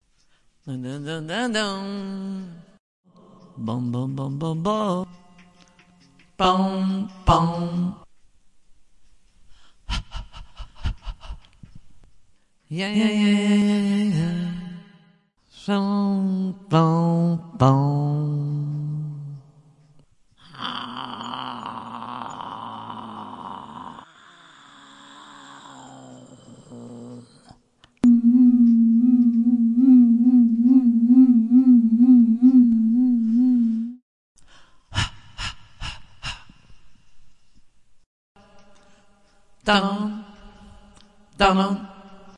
Imaginar sounds 1
free, imaginary, personal, roses, sampling, souds, sound, sound-design, stretch